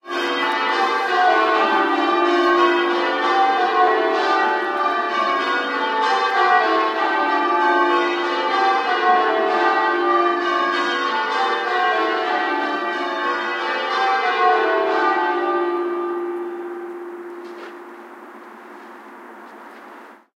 Cathedral Bells, Close, A
Audio of Guildford Cathedral's bells tolling just before a carol service. I have EQ'd out frequencies below 200Hz. The recorder was approximately 40-50 meters from the bells.
An example of how you might credit is by putting this in the description/credits:
The sound was recorded using a "Zoom H1 recorder" on 13th December 2017.
cathedral, tolling, ringing, bells, ring